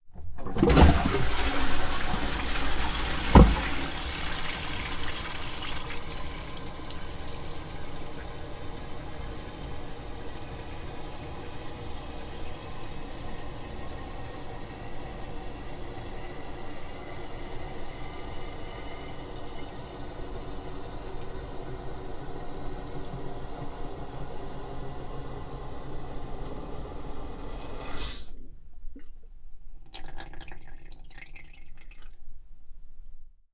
bathroom toilet flush inside the tank D100 XY
d100, pcm, flush, bath, toilet, recording, tank, bathroom, closeup